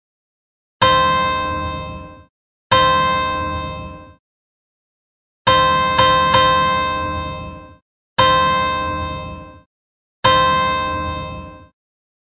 Some plucks with old zither instrument recorded at home, retuned in Ableton.
home-recording, rodentg3, Zither